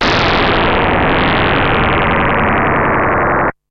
FM Ambient Noise2
Volca FM ambient sound 2
ambient, FM, fx